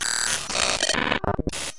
Recording a looper back into itself while adjusting the looper start and end positions. Iteration #3